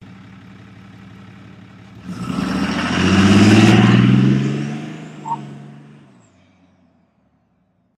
Truck-Diesel 07Dodge Launch
diesel, launch, truck